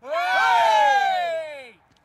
A group of people (+/- 7 persons) cheering and screaming "Yeeaah" - Exterior recording - Mono.